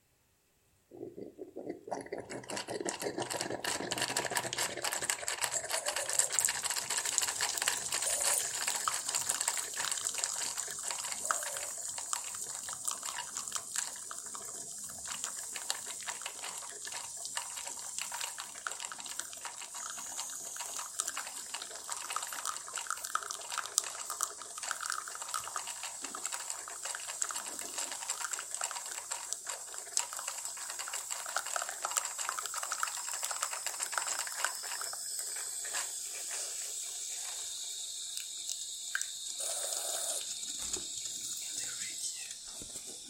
Coffee maker
A coffee-maker making coffee, coffee pouring into a cup. Recorded with my Samsung phone.